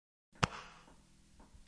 golpes de pecho
pecho, golpes, hombre